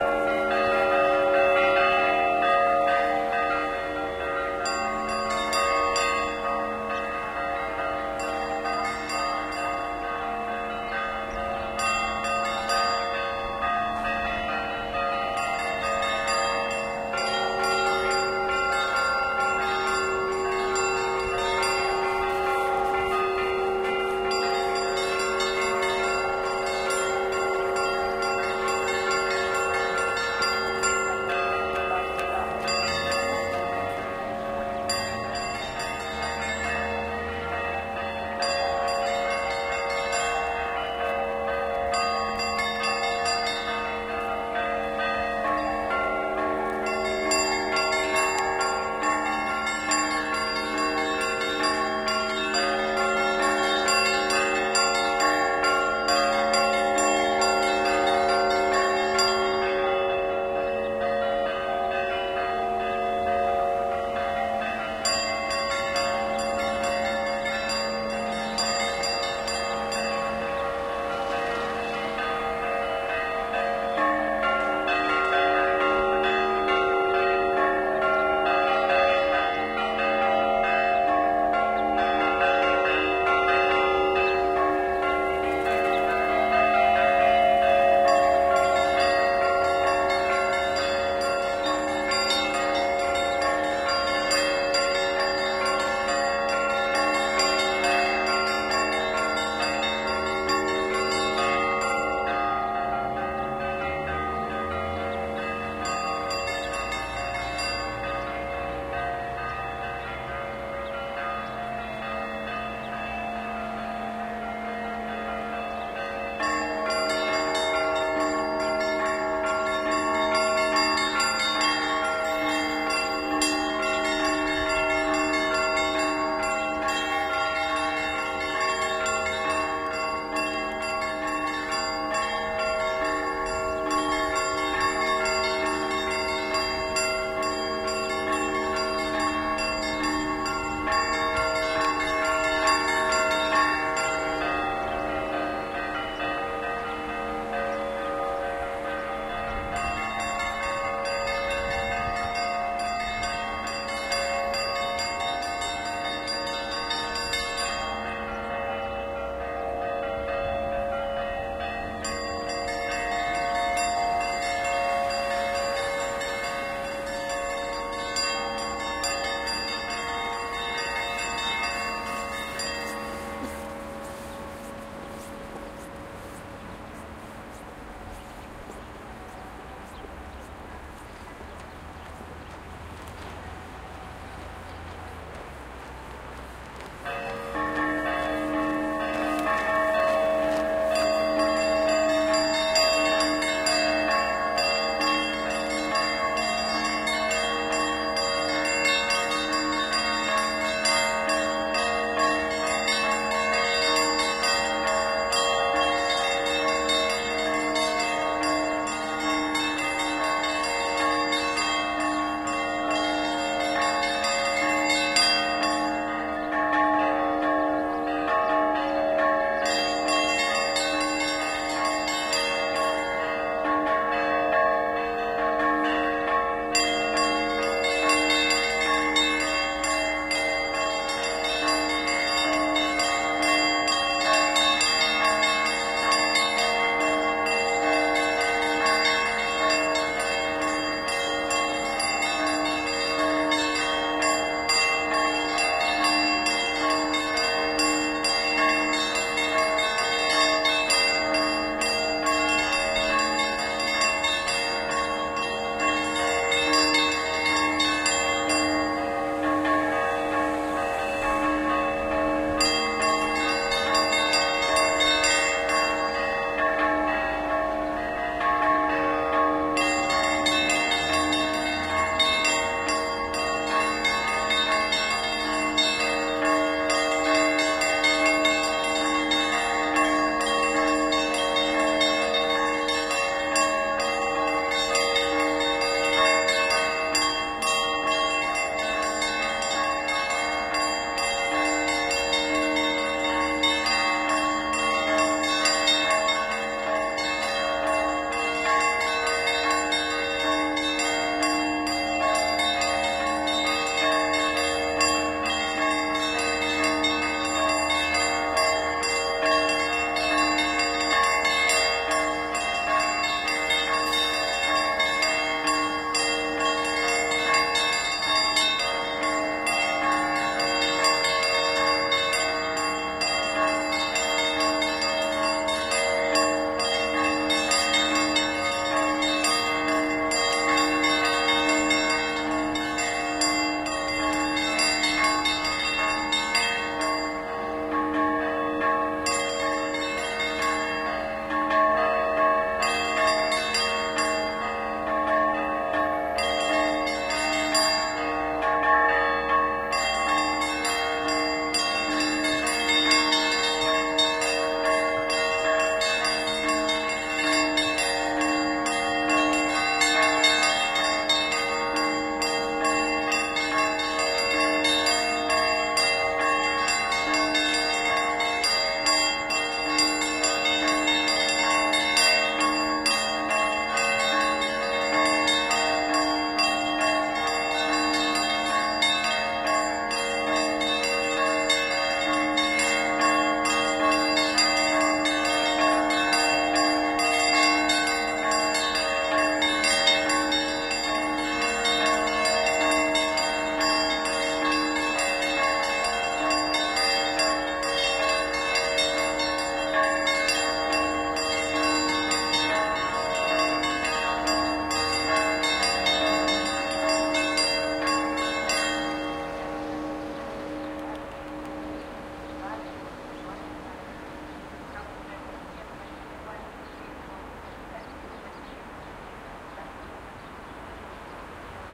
Church bells chime. St. Nicholas Cossack Cathedral in Omsk.
See also in the package
Duration: 7:01
Recorded: 11-02-2013.
Recorder: Tascam DR-40
church-bell, ring, ringing, cathedral